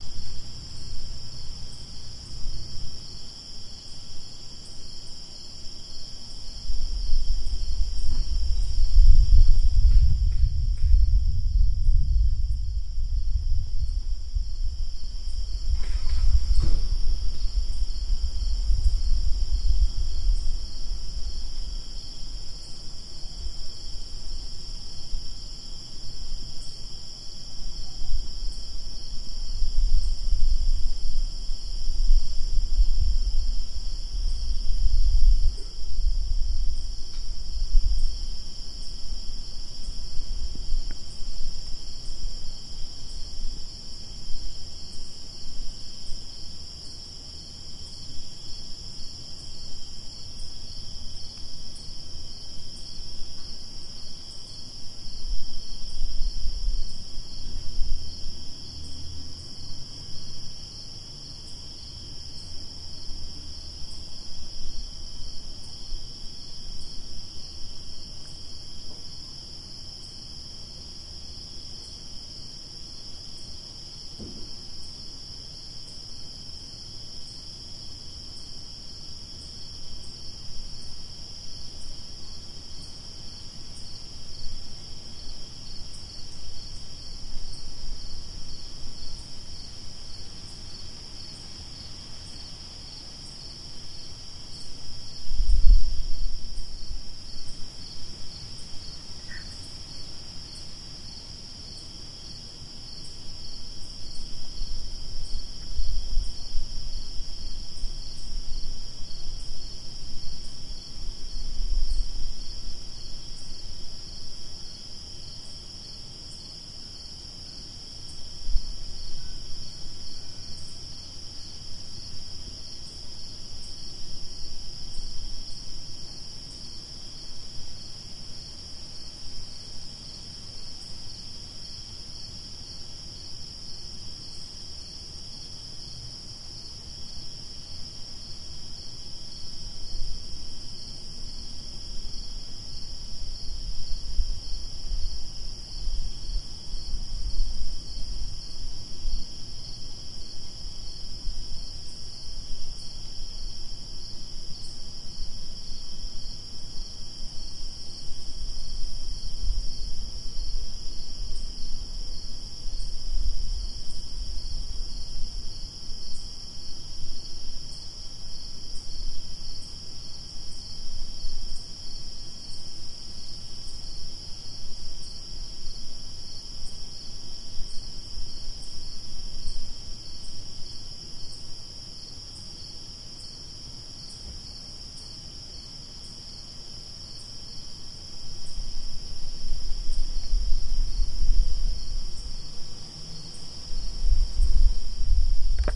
nightporch between walls2
tascam recording of night-time outside in quiet neighborhood, chirping, still weather, late-summer. from upper level balcony mic between two houses close together
chirping, neighborhood, night-time